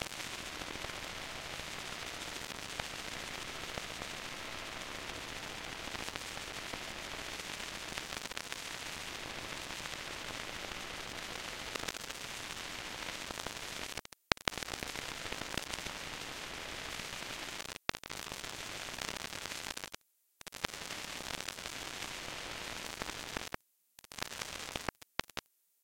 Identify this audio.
More crackles and clicks representing rain... All sounds were synthesized from scratch.
atmosphere, dry, fx, hollow, insects, minimal, minimalistic, noise, raw, sfx, silence